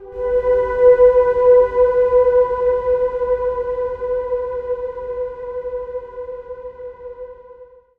SteamPipe 6 DreamPad C4
This sample is part of the "SteamPipe Multisample 6 DreamPad" sample
pack. It is a multisample to import into your favourite samples. A
beautiful ambient pad sound, suitable for ambient music. In the sample
pack there are 16 samples evenly spread across 5 octaves (C1 till C6).
The note in the sample name (C, E or G#) does indicate the pitch of the
sound. The sound was created with the SteamPipe V3 ensemble from the
user library of Reaktor. After that normalising and fades were applied within Cubase SX & Wavelab.
ambient, multisample, pad, reaktor